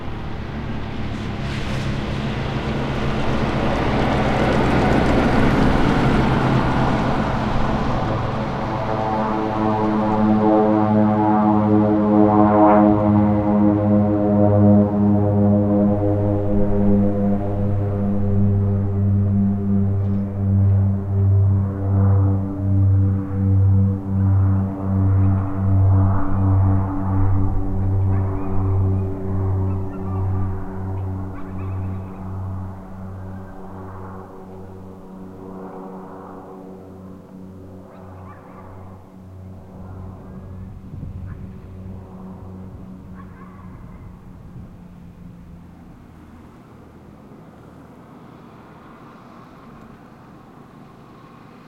airplane prop distant take off +truck pass overlap
take, plane, prop, airplane, off, distant